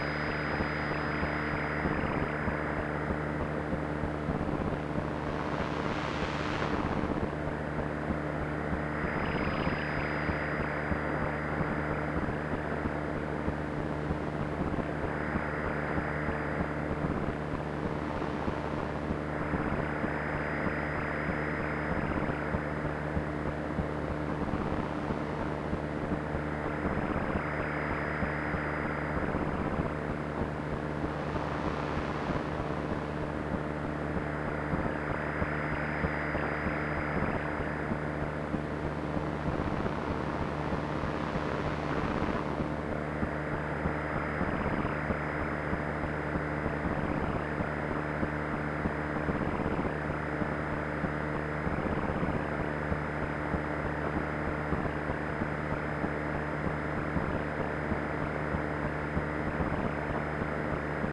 radio fuzz4
More static radio fuzz.
space, analog, static, lofi, noise, radio, telecommunication, old, receiver